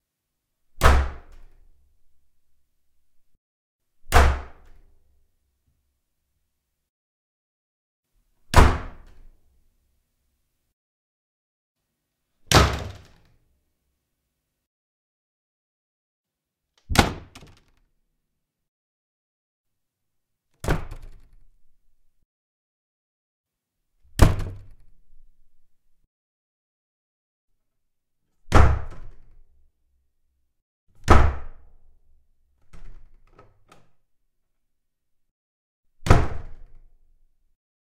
Slamming wooden door, changing distance, mike and side.